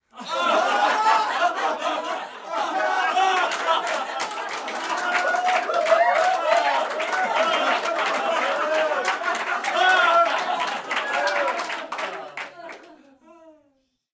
Recorded inside with about 15 people.
adults, applaud, applause, audience, cheer, cheering, clapping, crowd, group, hand-clapping, inside, people, theatre